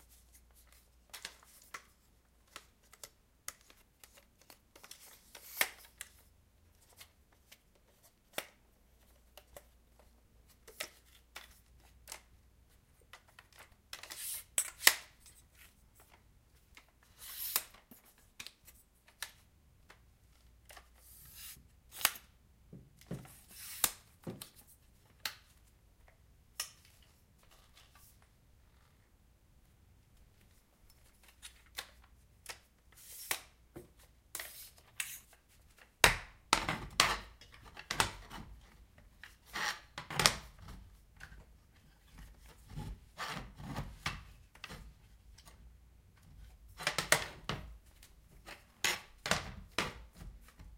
sound tripod opening and situating on tile floor homemade
In this sound, I'm opening up a metal tripod and situating it on a tile floor (louder taps at the end). It's a very light, cheap tripod with legs that are likely aluminum. The legs are hollow tubes with two segments and little latches to hold the segments open/closed. I'm opening the latch, sliding out the leg, then closing the latch. This happens twice per each of three legs. That sliding sound could likely be used separate from the complete tripod process. I've uploaded a few tripod recordings in case this one doesn't work for you!